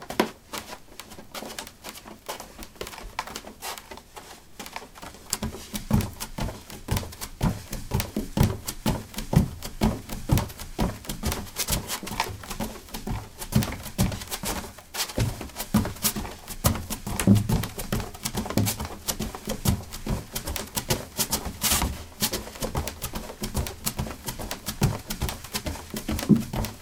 Running on a wooden floor: bare feet. Recorded with a ZOOM H2 in a basement of a house: a large wooden table placed on a carpet over concrete. Normalized with Audacity.